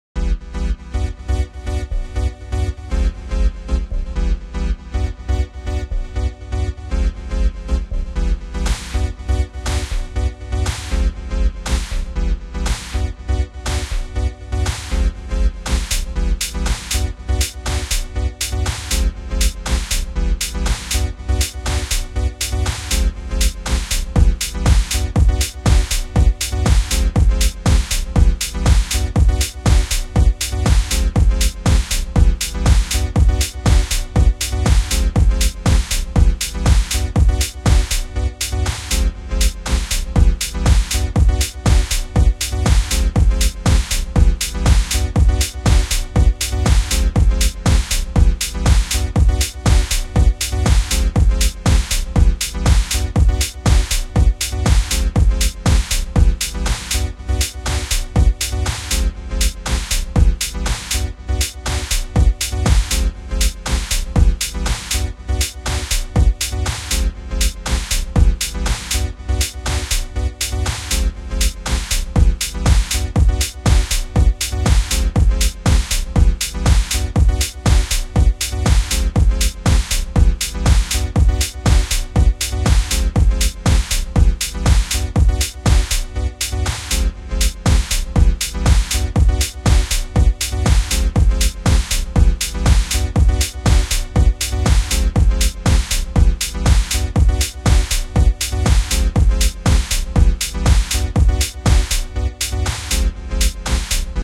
90´s minor chord house tecno loop pattern
Made in FL 11, used Proteus VX with a 909 kit. Simple chord progression, very 90s, Chicago.
loop, chord, techno, house, pattern, minor